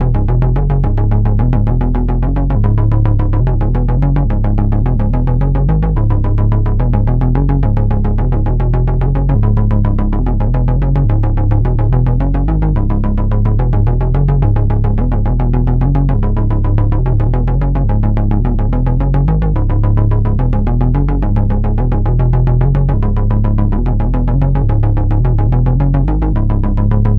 Uneffected sequence (BPM around 106?) of two VCOs through moog filter. Sounds like an old sci fi or old 60s/70s technology documentary intro.